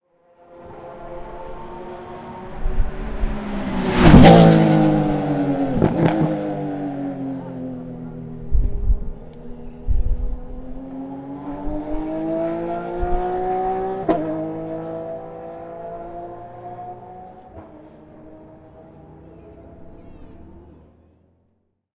FiaGT.08.PotreroFunes.RedHotBrakes.1.1
A sudden approach of a high speed car braking hard at a chicane.
accelerating, ambience, argentina, car, engine, fia-gt, field-recording, noise, potrero-de-los-funes-circuit, race, racing, revving, sound, zoomh4